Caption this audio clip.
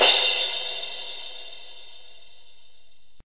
this is a crash which i recorded from a zildjian a-custom crash and i sustained it in logic a lot
akg c1000s about 5 inches on top of the crash